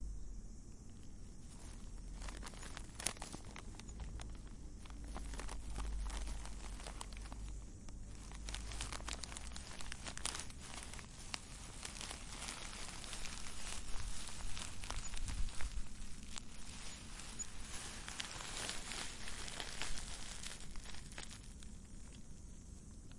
plastic wrapper paper crumple
a kitkat wrapper or something being rustled
crumpling, rustling, wrapper